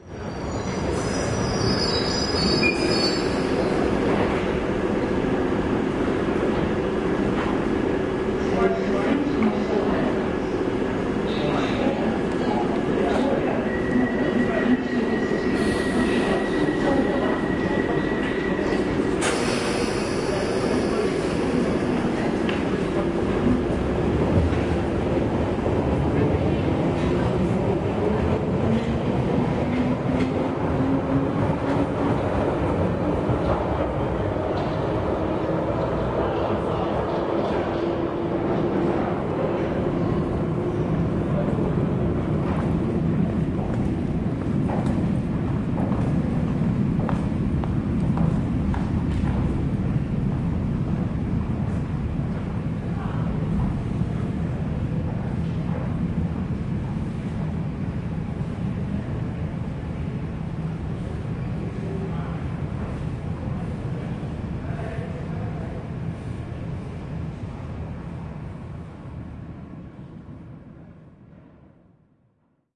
808 Kings Cross Underground 2
A tube train arrives and leaves. Recorded in the London Underground at Kings Cross tube station